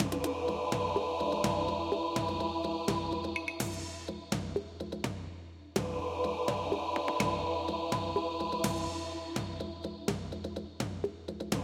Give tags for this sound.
electronica; braindance

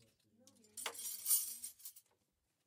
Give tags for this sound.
cutlery; fork; kitchen; knife; metal; rummaging; spoon; steel

